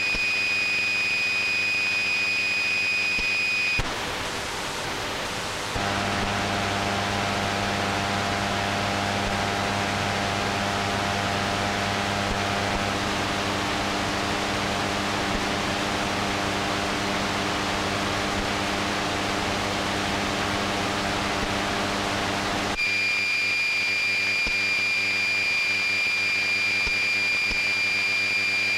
AT&T Cordless Phone receive Call call dropped AM Radio
Electro-magnetic interference from an AT&T; cordless phone handset CL82301 when held near the internal Ferrite antenna on the back right of a 13-year-old boombox near the bottom of the AM broadcast band. Recorded with Goldwave from line-in. Phone is charging on charger. You hear a single tone of charging on standby, then I call it with my cell and you hear a buzzy hiss as the phone rings, then the low buzz as I drop the call from the cell phone.
EMF, EMI, am-radio, beep, buzz, call, cordless-phone, digital, electro-magnetic, electronic, glitch, hiss, hum, interference, lo-fi, noise, pulse, radio, radio-interference, static, t, tone